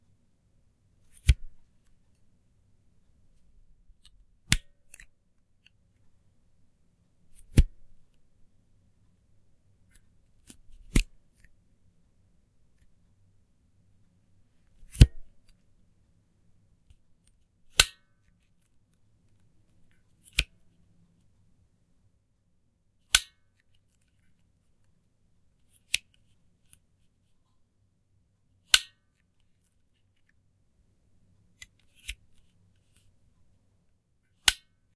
Flashlight On Off
flashlight clicking on and off
flashlight, off, click